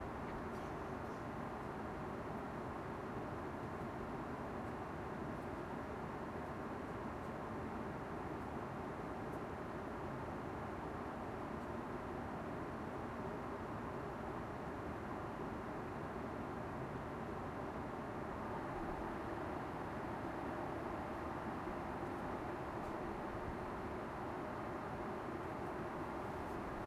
QF23 QANTAS A330 to Bangkok 3
Inside a plane in business class everyone asleep. Just noise as it sounds inside the cabin.
Interior, Aircraft, Plane, Flight